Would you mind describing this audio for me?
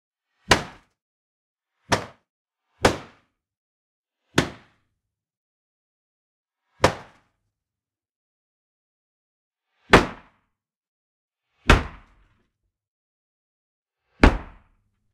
fighting hits
A hit recorded and processed several times to make it sound multilayered, with more frequencies and give it more impact
bang, battle, cinematic, fighting, foley, impact, match, movie, sounddesign